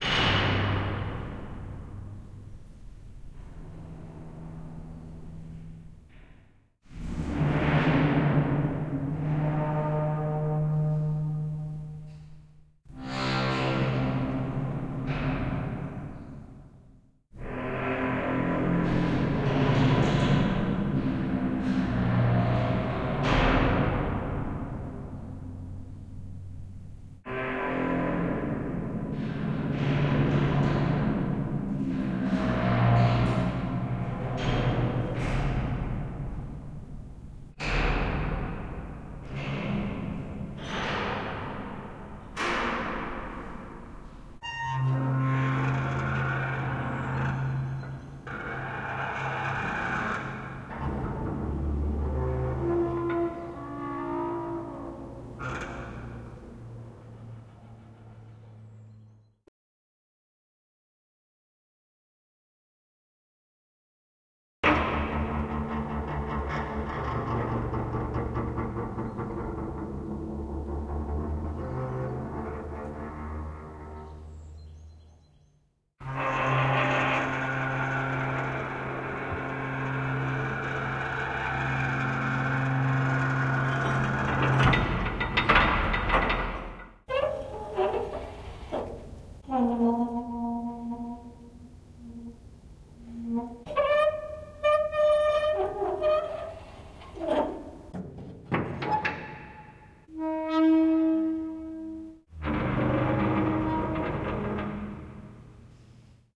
Ft Worden WA Doors Morphagene Reel
Morphagene reel based on field recordings of metals doors in Fort Worden State Park. Lots of screeching, reverberent clanging, but also some more mellow sounds that sound more like a bowed string.
Content is arranged as a Make Noise Morphagene reel, with each sound assigned to its own splice.
washington, makenoise, clang, mgreel, fort, metal, screech, field-recording, morphagene, door, fort-worden